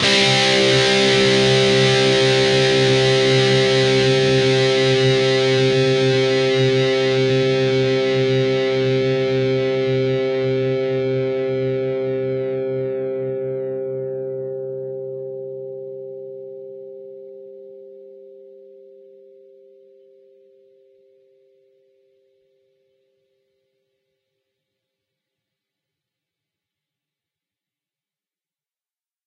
Dist Chr D&G 5th frt
D (4th) string 5th fret, and the G (3rd) string 5th fret. Down strum.
distorted, chords